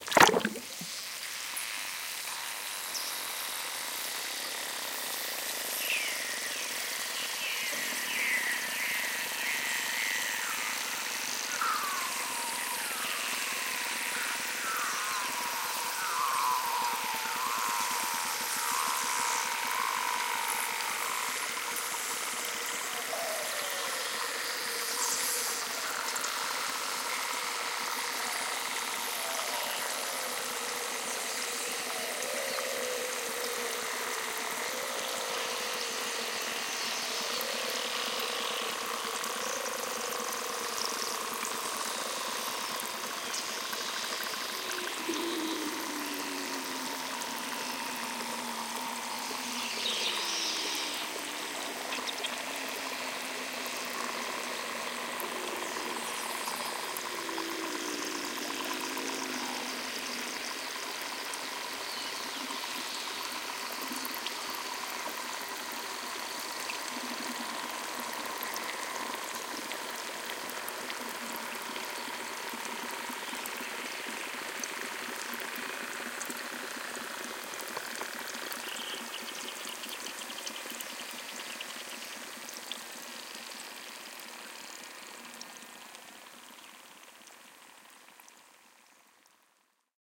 clay-in-water
What happens when you throw a small brick of dry clay in the water? Of course it will dissolve. Nothing special you think? Just listen. Unforgettable experience.
bobbles, bubbles, stomach, dissolve, water, dissolving